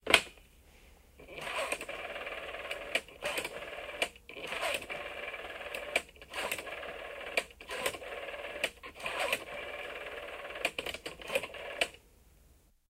Phone: Rotary Dial
Rotary dialing on an old phone.
Phone, Rotary, Old-Phone